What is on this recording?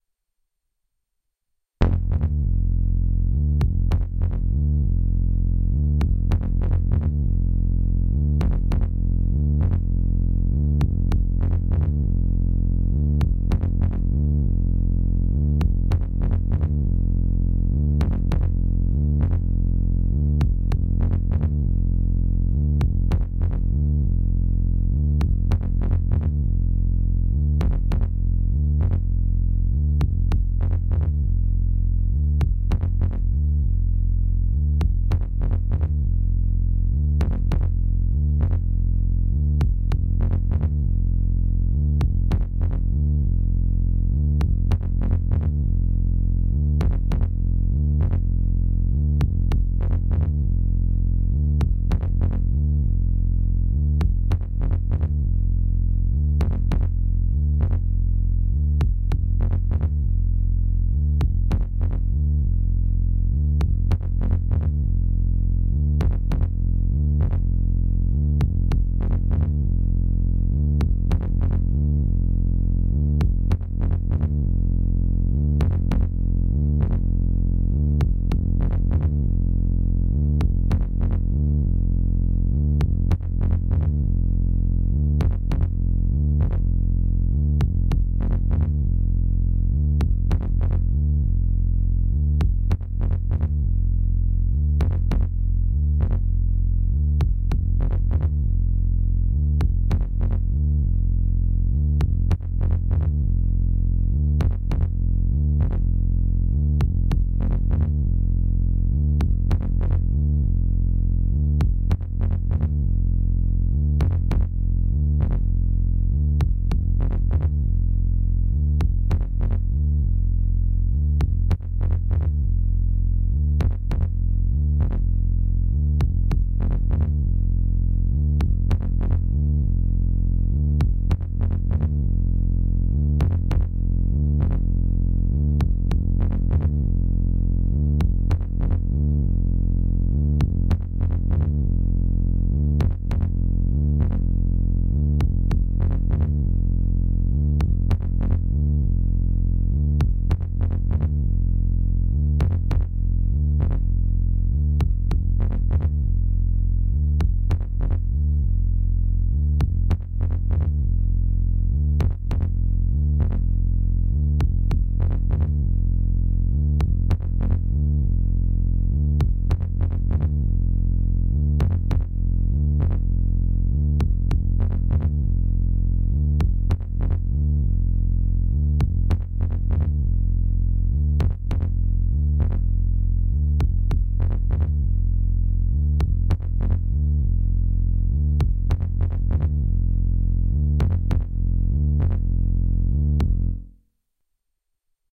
Robot marche 20
Marching robots, they weren't that terrible, but I still snuck out to the cave. Op-z experiments
android, automation, command, conveyor, cyborg, droid, electronic, interface, machine, Marche, music, opz, robot, robotic, spaceship, transporter